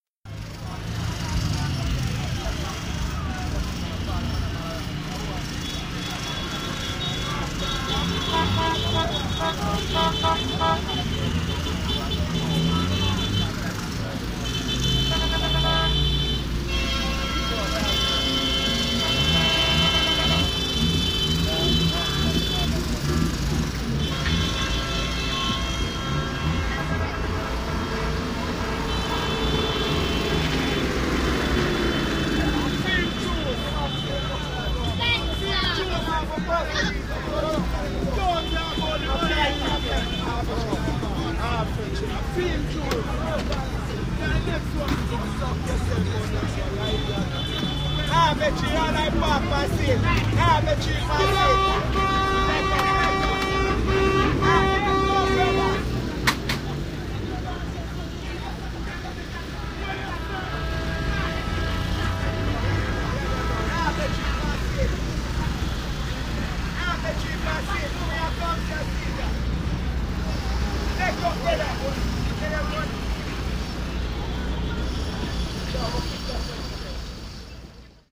Crossroads bus stop
Cross Roads is a major town centre in Kingston Jamaica. This recording was made with a Huawei VNS L53 mobile phone while standing at the main bus stop in the town centre.
jamaica, atmosphere